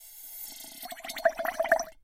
Bubbles Descending short into glass of water